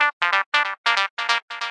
TR LOOP 0413
loop psy psy-trance psytrance trance goatrance goa-trance goa
goa, goa-trance, goatrance, loop, psy-trance, trance